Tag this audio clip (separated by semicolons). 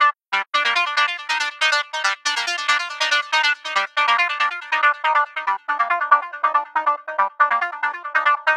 goa; goa-trance; loop; psy; psy-trance; psytrance; trance